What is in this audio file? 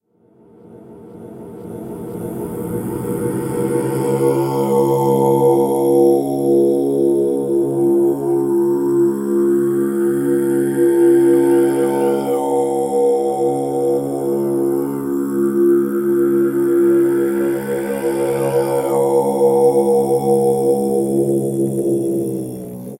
Tibetan chant played in reverse. Interestingly it doesn't deviate too much from the original sound, meaning that the sound is fairly pure and sinusoidal.